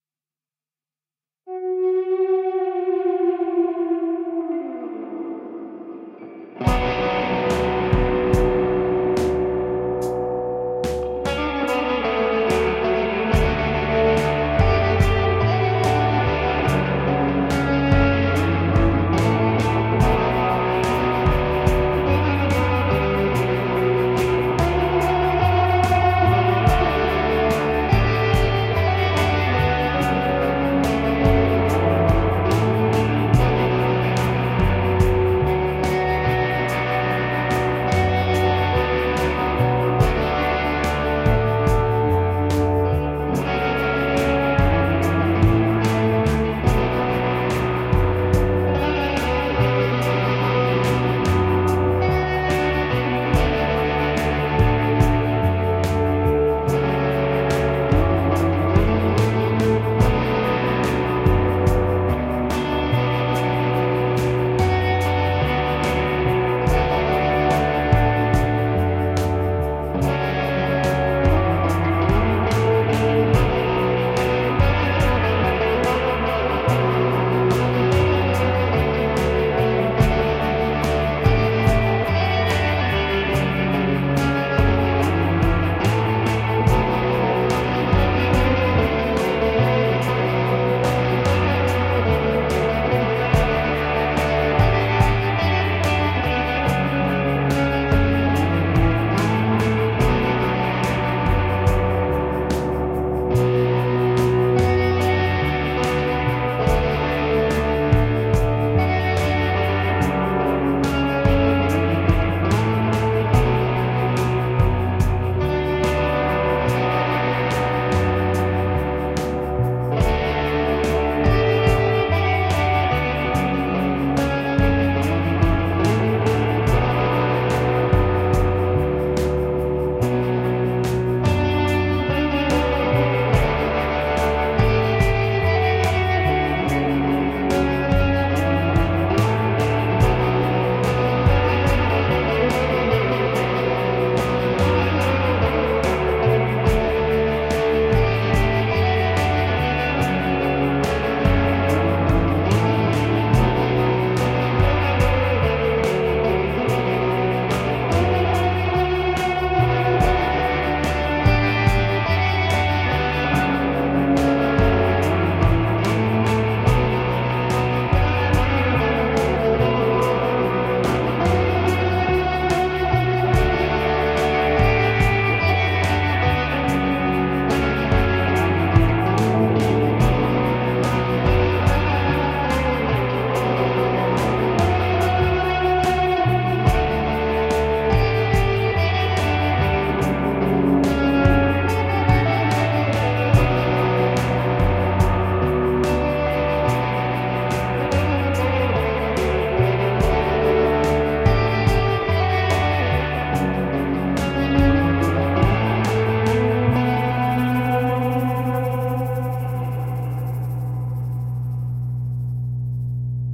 Grunge distortion guitar soundtrack
This is experimental electric-guitar soundtrack, where I using different effects: overdrive, tremolo, reverberation and delay. Also I creating smooth transition between channels and transiton between frequency in different channels. I added some drums and bass party, and In finish, released some interesting atmosphere-ambient lo-fi soundtrack, Well suited as a soundtrack, or maybe you can use it in your music projects, podcats or something like that. How your imagination will be can.
Sequence of chords Hm D F#m.
Tempo 72 bpm.
ambient, atmosphere, background, delay, distortion, echo, electric-guitar, experimental, Grunge, guitar, lo-fi, music, noise, overdrive, Psychedelic, reverberation, rock, sketch, sound, soundtrack